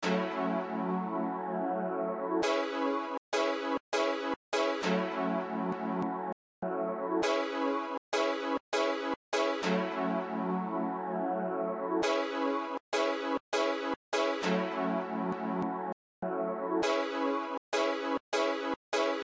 Retro Synth Loop
A loop I recorded and edited with Logic Pro X. Sounds like it could be the start of a rap, but I don't write rap, so here you go.
BPM- 100. Chords- F#m, C#m.
80s, Chopped, Chops, Chord, Eighties, Lead, Logic, Loop, Rap, Synth, Vintage